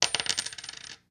Clad Quarter 1
Dropping a quarter on a desk.
Currency, Quarter, Coin, Money, Drop, Desk, Short